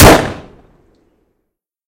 Single Gunshot 5.3
Created with Audacity.
Rifle, Single